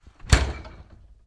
freezer close
close a freezer door, some bottles clack